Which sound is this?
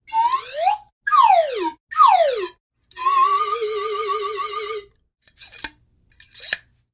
A couple sounds played with a slide whistle.